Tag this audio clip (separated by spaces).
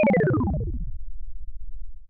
death game pixel